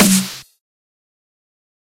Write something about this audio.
Dubstep Snare 7
A fully synthesized snare made in FL Studio that has not been processed that much.